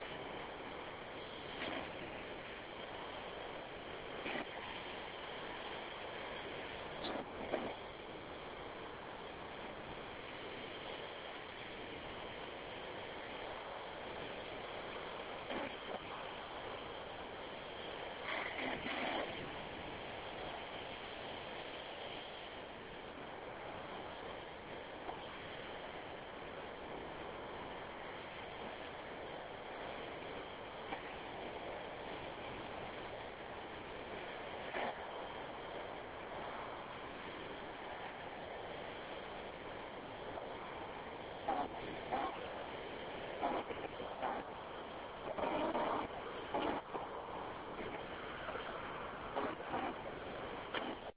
Background noise at the mall.